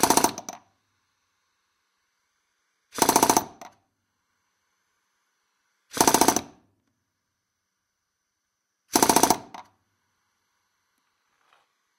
Riveting hammer - Ingersoll Rand - Start 4
Ingersoll Rand riveting hammer started four times against a metal plate.